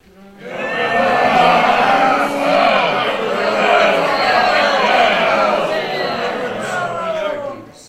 Grumbling Audience
Recorded with Sony HXR-MC50U Camcorder with an audience of about 40.